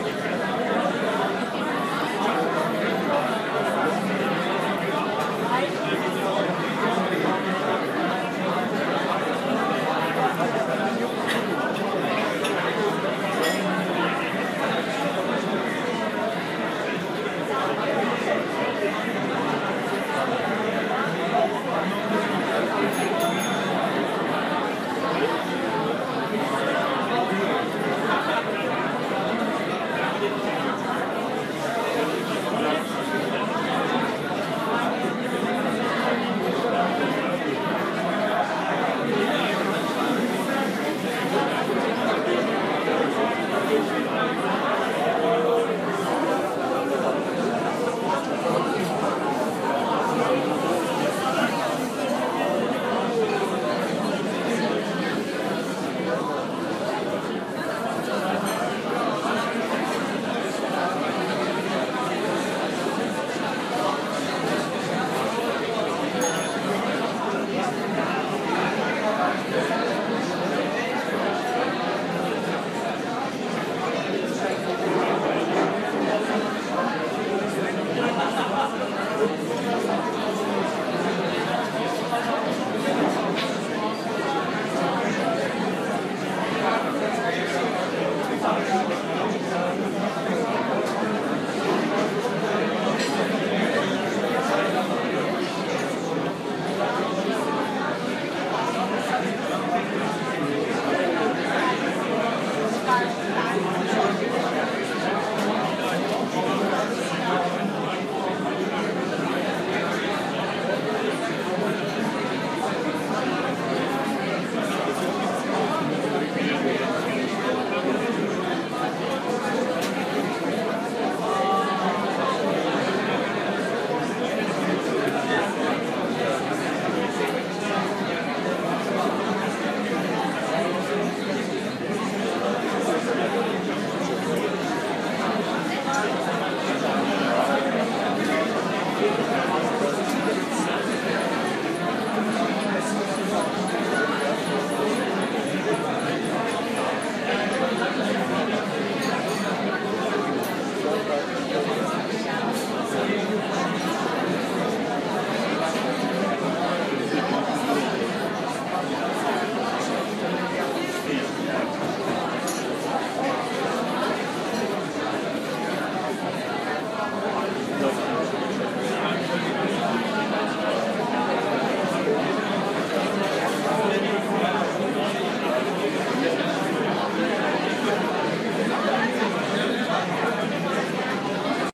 recorded in a bistro